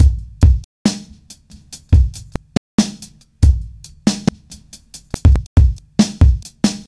Spiffy Spank
Drum loop, 70 bpm, 4/4, 2 bars, reassembled with ReCycle.
drums, loop